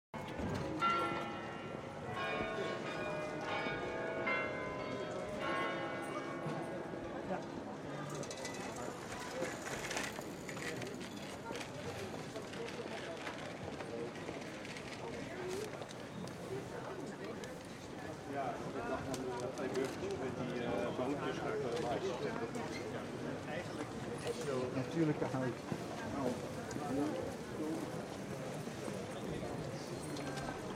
Church bells ringing market (general noise) bike & people passing.